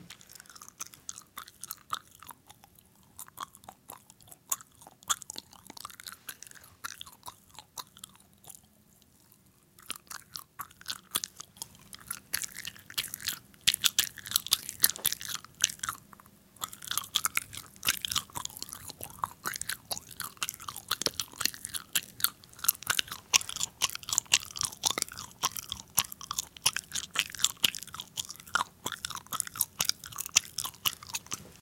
sonido de chicle mascado
sound of gum in teeth
chicle, gum, mascar